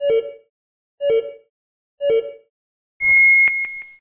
S001 Countdown Signal
Beeps that countdown from three to one at the beginning of a rally
beep, countdown, Rally, signal